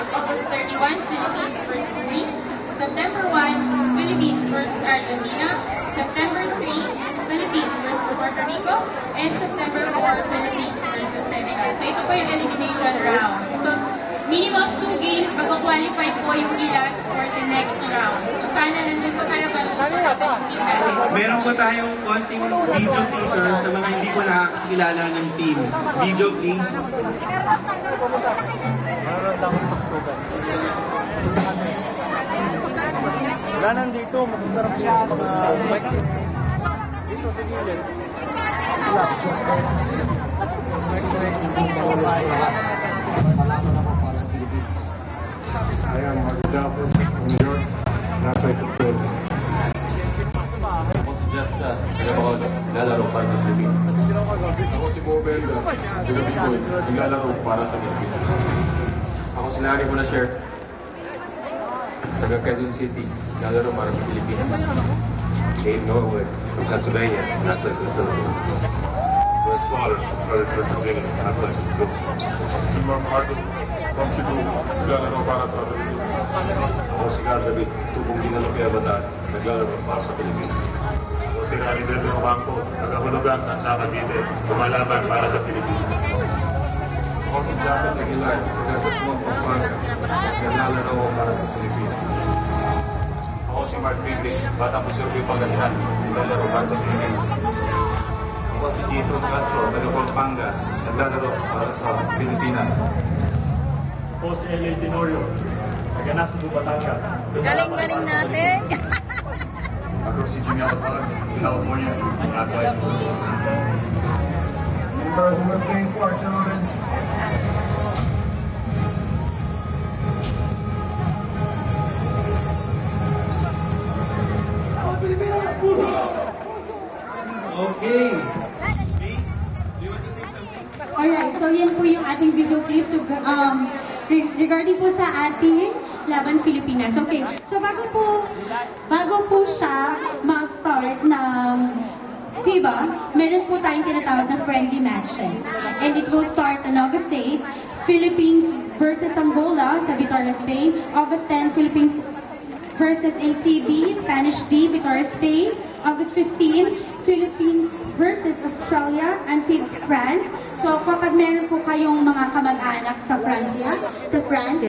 Philippine National holiday in Plaça dels Angels, Barcelona on Sunday June 15, 2014 at night. Announcement of upcoming international games Basket ball from Philippines and a video presentation of FIBA in the voices of other party goers heard. It is interesting to hear the contrast between the presenters voice and the voices of the players or actors who appear in the video. Recorder with a Zoom H1 recorder.